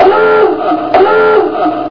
Submarie dive horn better
submarine, dive, horn, submerge, sub